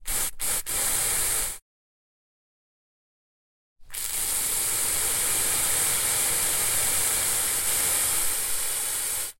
2 insect repellent
CZ, Czech, Pansk, Panska